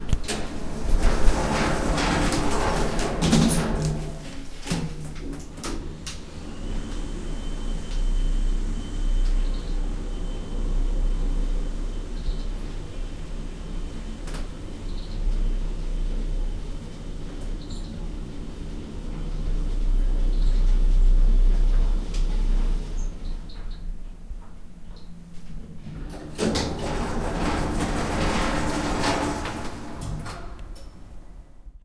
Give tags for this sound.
closing doors elevator lift mechanic opening

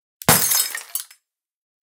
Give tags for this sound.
broken glass smash debris smashing shatter breaking

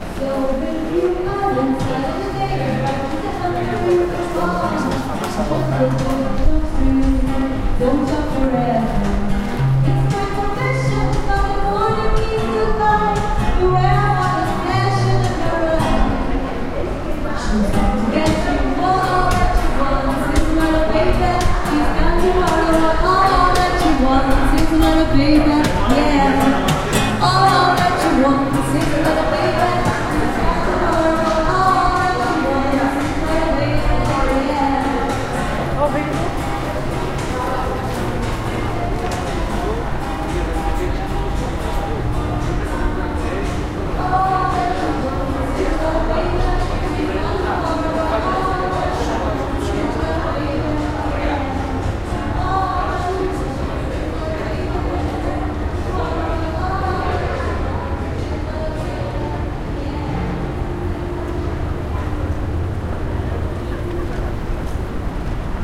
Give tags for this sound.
Barcelona Zoom

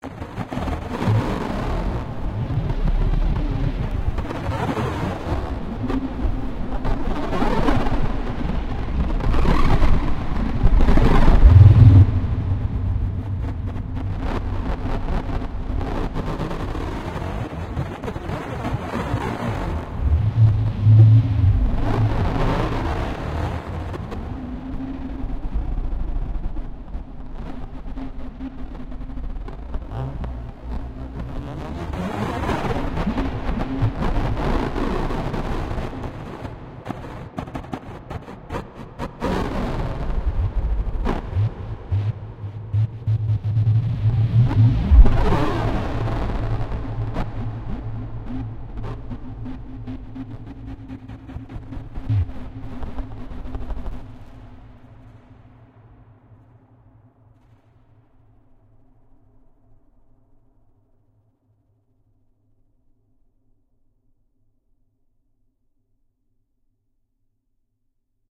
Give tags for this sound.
alien automation starship spaceship space droid ufo robot science-fiction aliens mechanical galaxy cyborg computer artificial

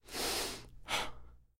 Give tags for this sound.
Persona-suspirando sigh Suspiro

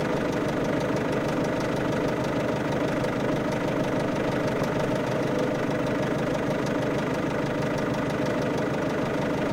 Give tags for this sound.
idle loop 308 motor engine peugeot rode vehicle zoom5 automobile exterior car

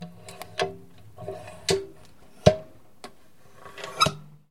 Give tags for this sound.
wood-stove stove close wood

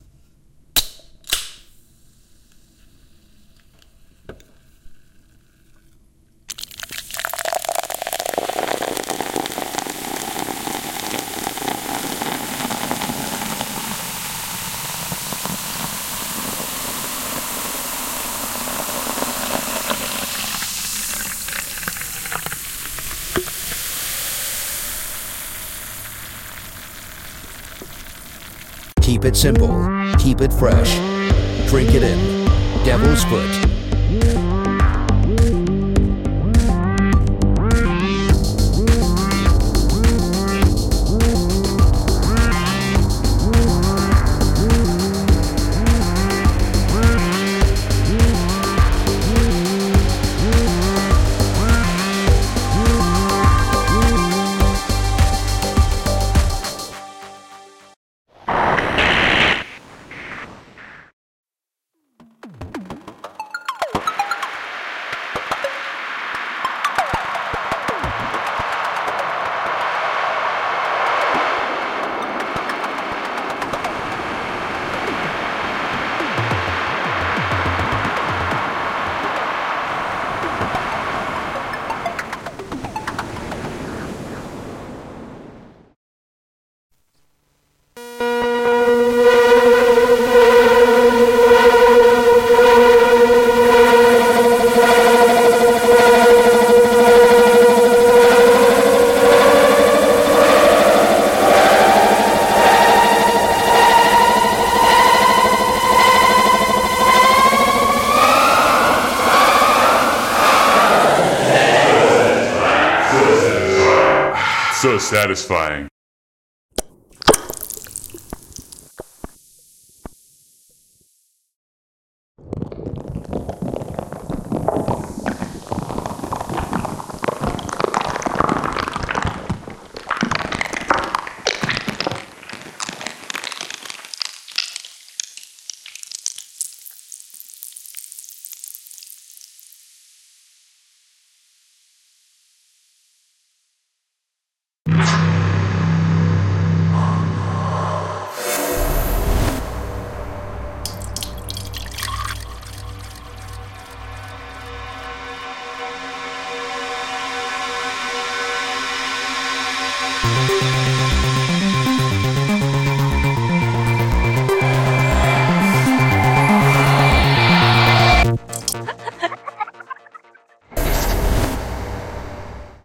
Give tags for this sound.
devils-foot ginger-beer mgreel morphagene

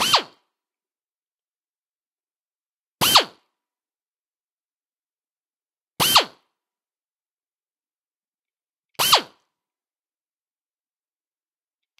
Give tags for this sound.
labor desoutter cutter pneumatic metalwork air-pressure pneumatic-tools crafts motor work tools 4bar 80bpm

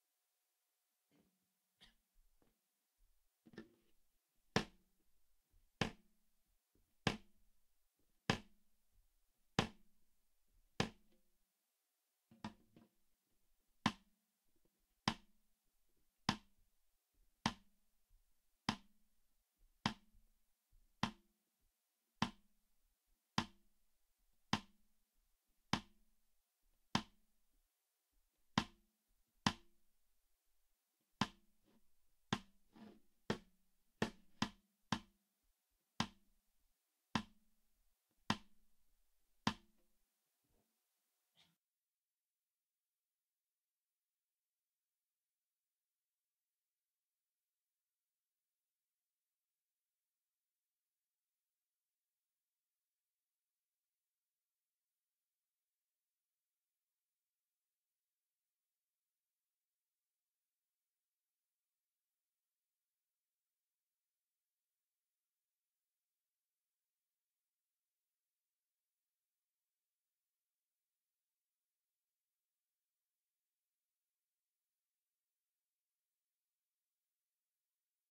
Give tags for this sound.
recording T 200 bottom 1 55 seperate SNARE BD raw A B CD

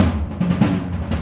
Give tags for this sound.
drum fill floor tom

